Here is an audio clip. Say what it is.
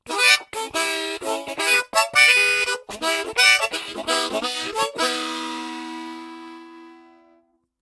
Harmonica recorded in mono with my AKG C214 on my stair case for that oakey timbre.